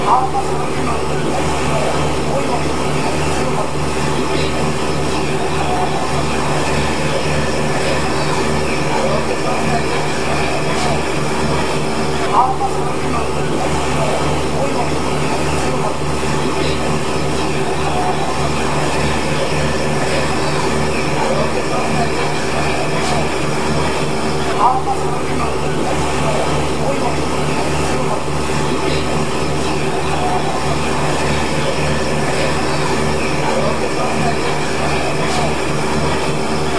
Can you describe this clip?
Passenger boat cruising